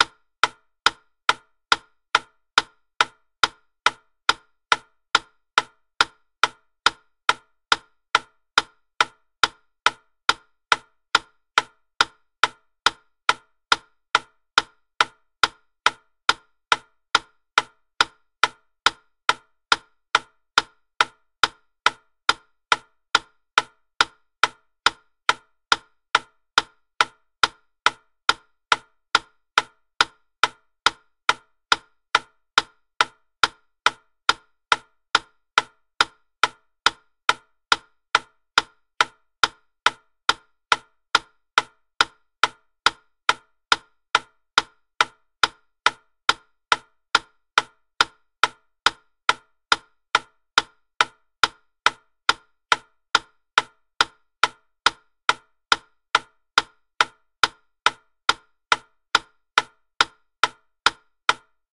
Wittner 140 BPM
Wooden Wittner metronome at 140 BPM, approx 1 minute duration.
140-bpm, wittner-metronome